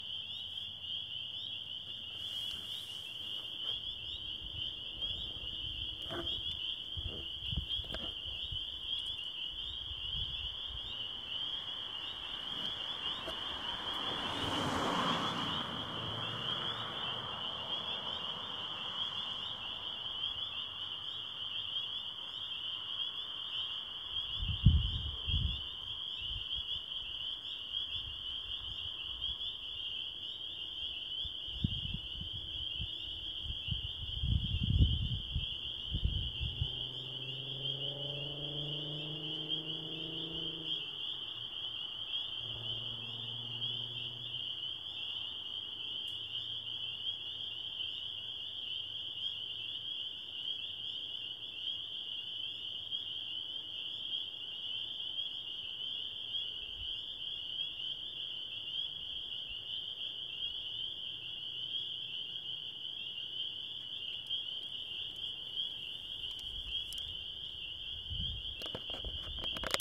Peepers by Oyster Pond Rd-1
Spring peepers (tiny frogs that live in the wetlands in New England) recorded in Woods Hole, Massachusetts on the evening of 15 April 2012. The location was near a road, and cars can be heard driving past. Recorded with a Zoom H2.